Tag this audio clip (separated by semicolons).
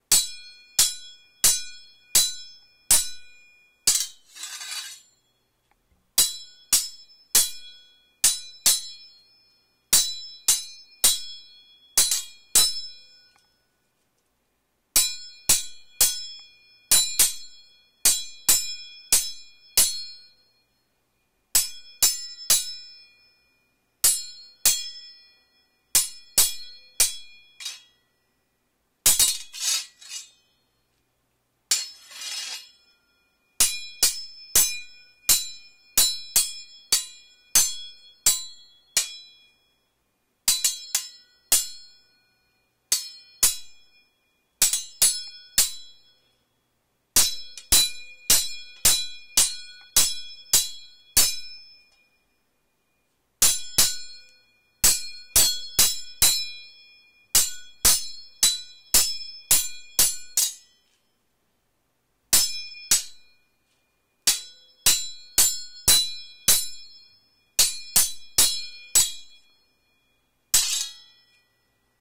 Medieval; Sword; Fight; Battle; Fantasy